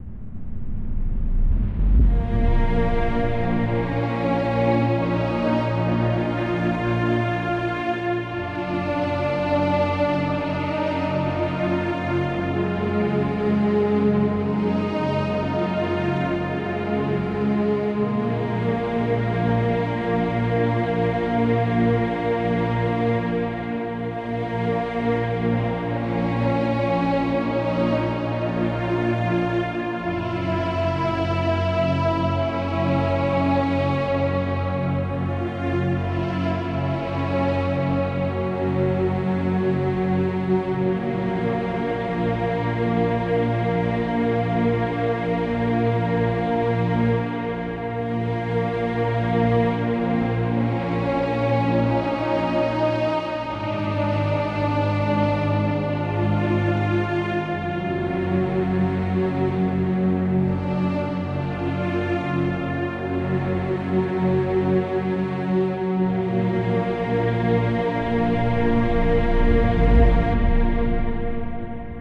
earth music by kris
hope u like it I did it on keyboard on ableton have a nice day :D
and got it from a dream the music and it took a long time to think about it and play on keyboard easy and I'm autistic called Asperger's :D
amazing-music, depressing, going-down-to-earth, old, sad, the-end-of-the-earth, unique, war